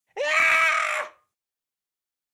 Grito Desconsolador s
man, Screaming, Scream